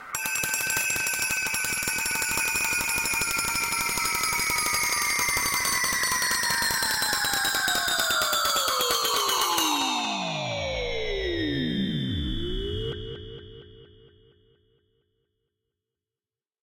Metal water bottle SFX - percussive pitch fall
Processing a recording from this pack to create a percussive sound that slowly falls in pitch.
Effect, Percussive, Percussion, Dark, Eerie, Pitched, Fall